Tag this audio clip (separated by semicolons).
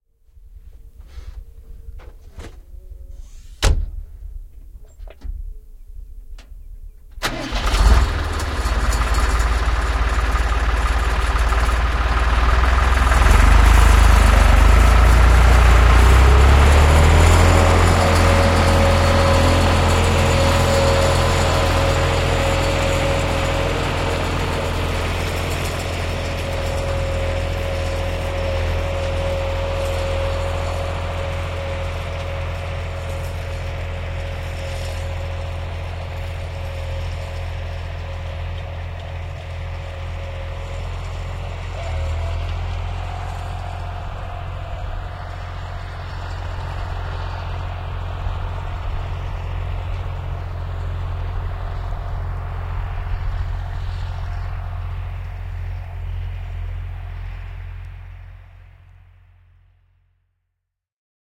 Tractor; Pelto; Yle; Tilling; Traktori; Suomi; Field-recording; Soundfx